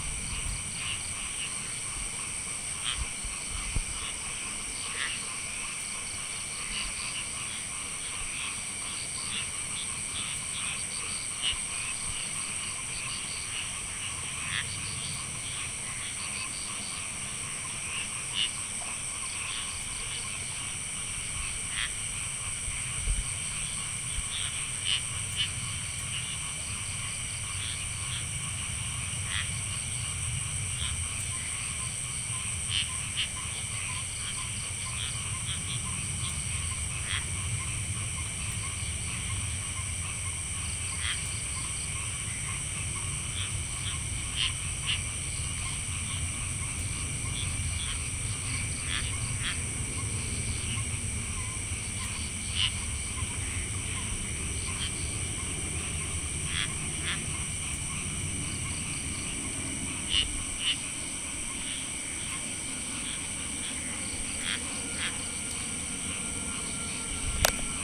Bali night loud insects geckos frogs
A solid sound bed of creatures at night in a tropical rice field. It was late afternoon in December 2013.
frogs, bali, crickets, insects